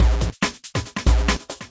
A simple beat usefull for anything you want thats not frenetic, its just a misc beat:)
Misc, Idrum, Beat